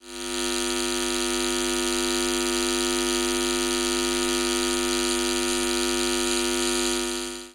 Static, Stylophone, A
Raw audio of amplified static produced from an electronic stylophone synthesizer. The recorder was approximately 5cm away from the stylophone's speaker.
An example of how you might credit is by putting this in the description/credits:
The sound was recorded using a "H4n Pro Zoom recorder" on 2nd November 2017.
static, buzz, electric, buzzing, hum, stylophone